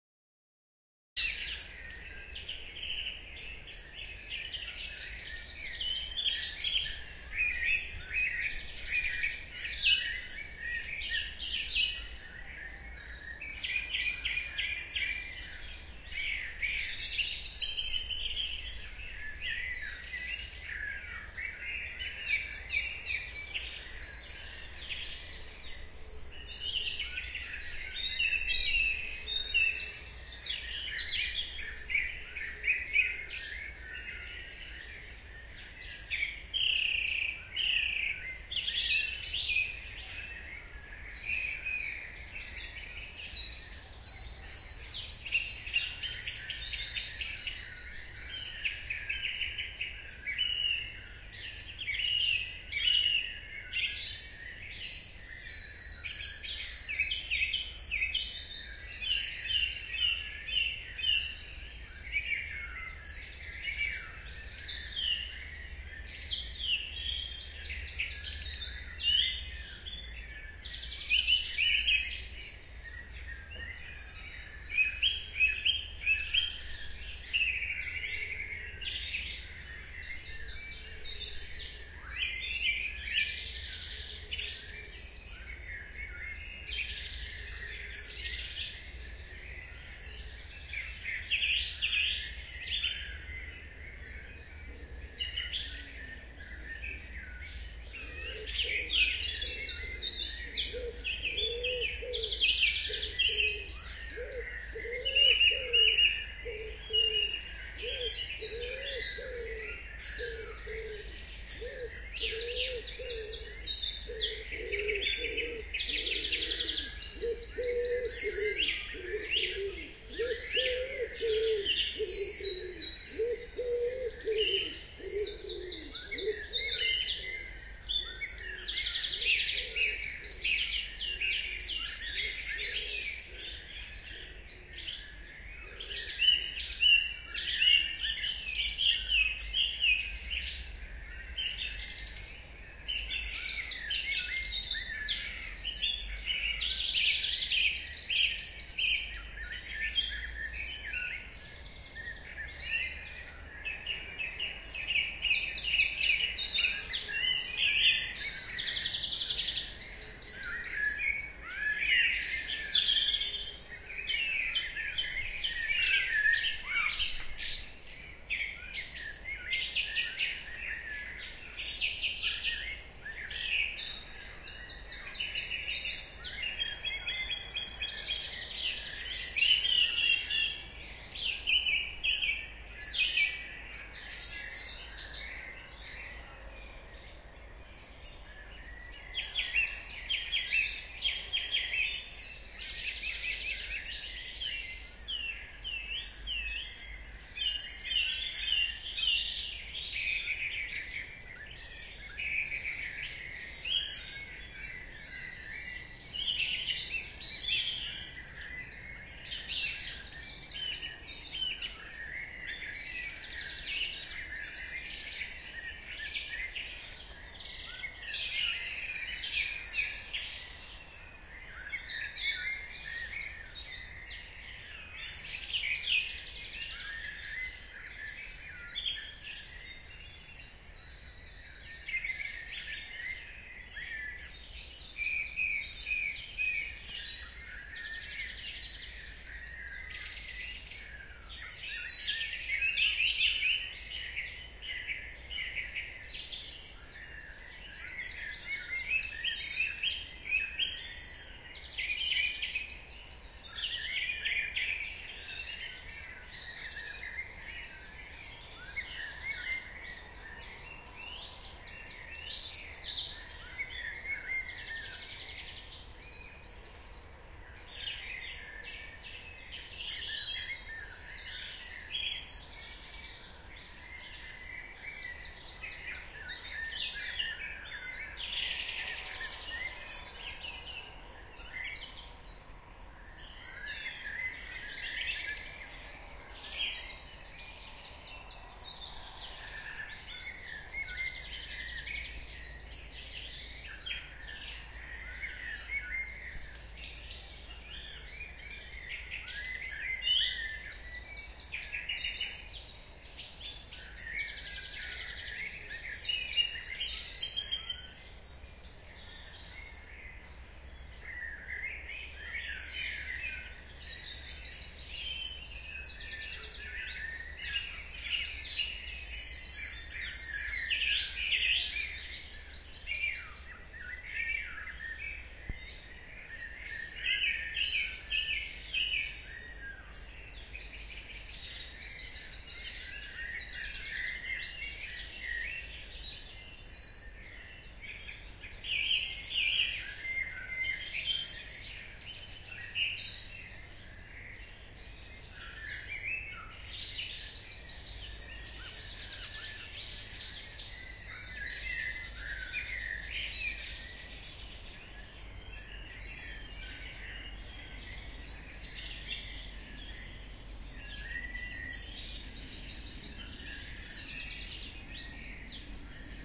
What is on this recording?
Dawn Chorus 4am Sat 13th June 1998
Dawn Chorus recorded at 4am on Saturday 13th June 1998
nature, ambience, birdsong, dawn-chorus